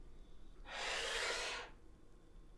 glass slide 06
sliding a glass across a table